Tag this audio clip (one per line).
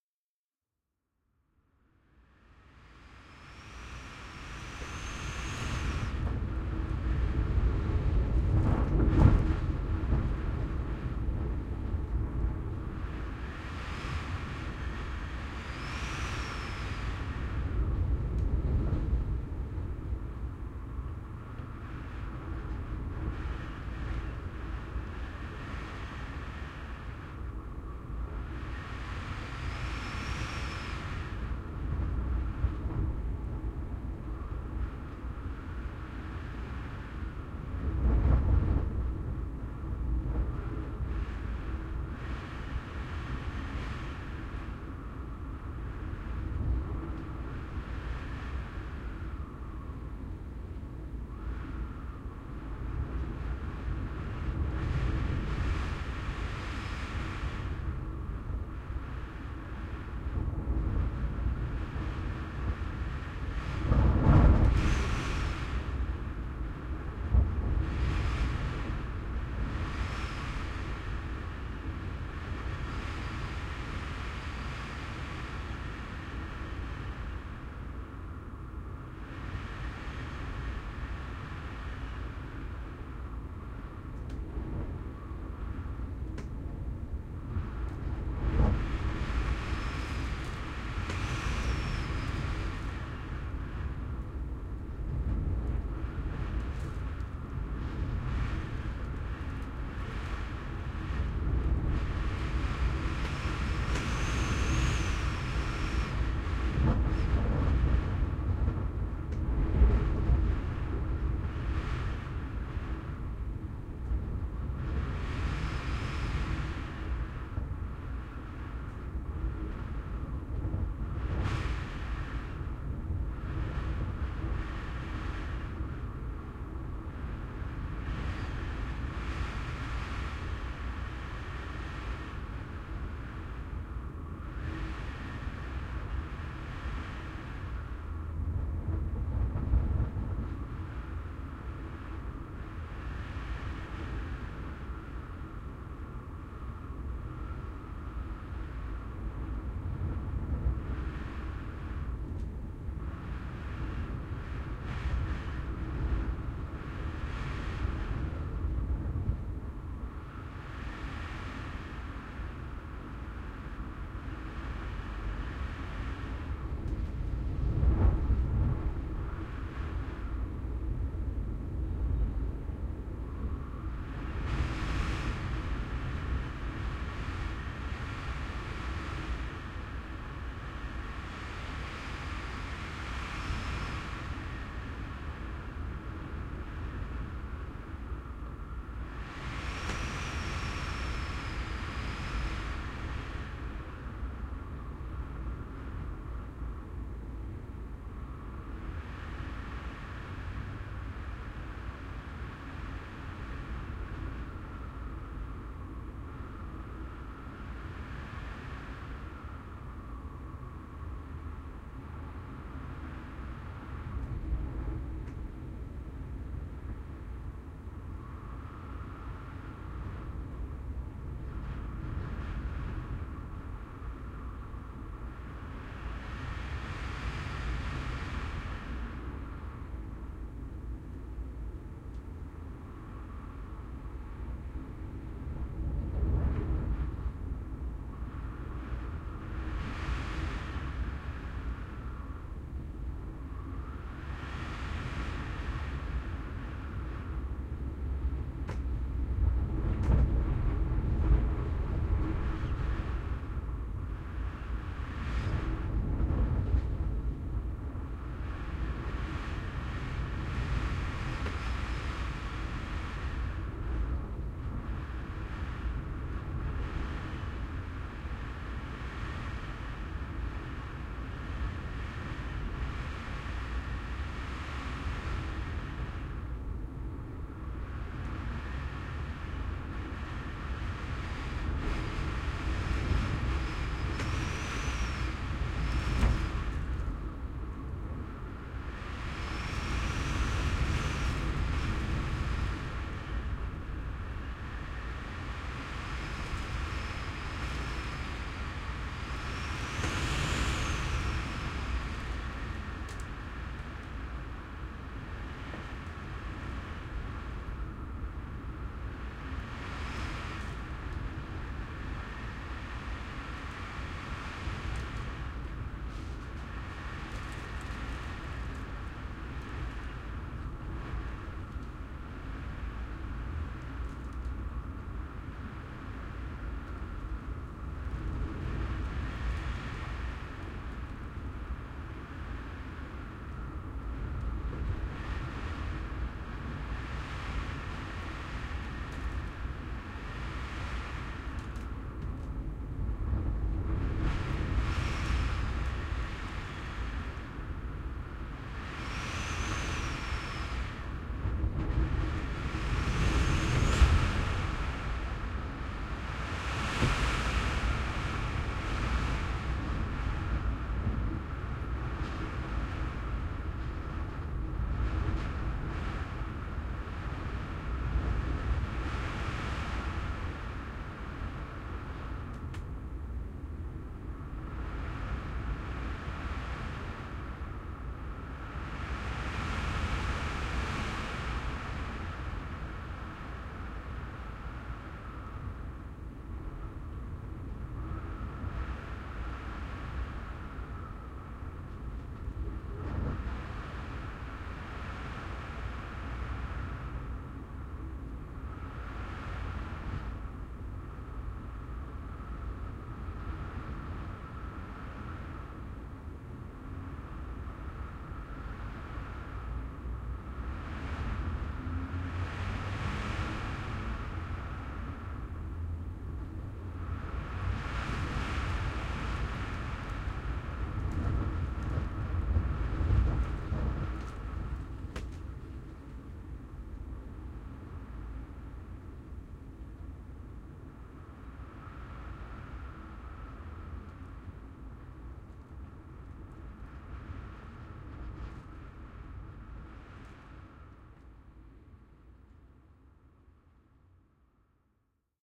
storm
ambience
window
room
Wind